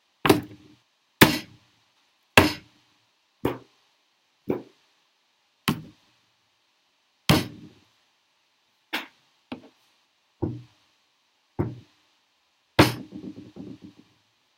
Hand hits the solid surface
Various sounds of hand kicking a desk and floor
desk, floor, hit, kick, rock, solid